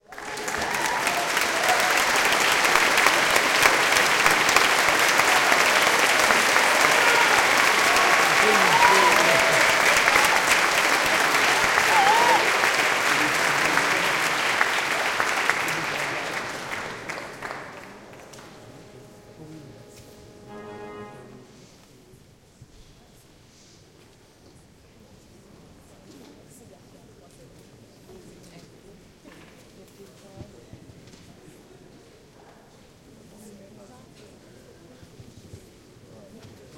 applause int large crowd church1
crowd, applause, church, int, large